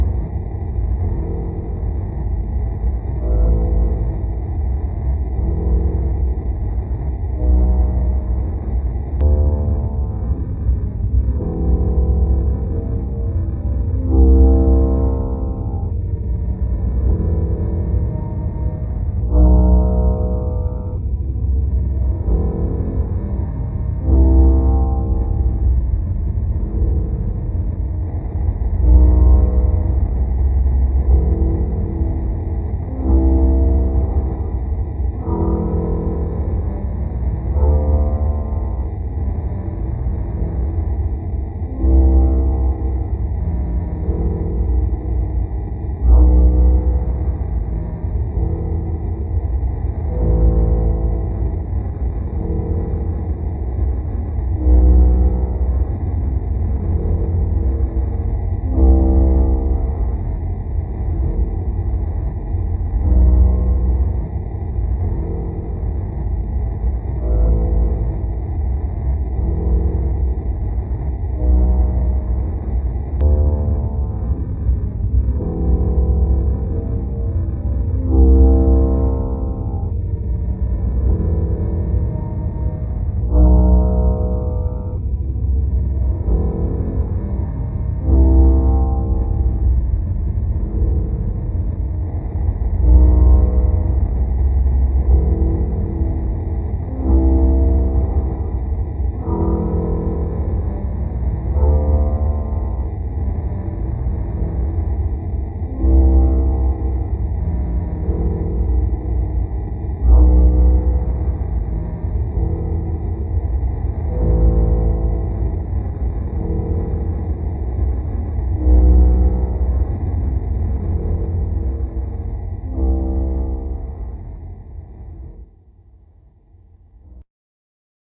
cordar musica
ambient, beat, mix